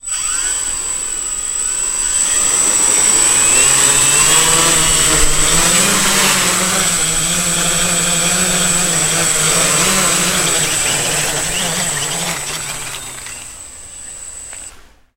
Hexacopter drone flight short
I recorded this sound on the stage with Zoom H4n and Sennheiser shotgun mic.
hexacopter, radio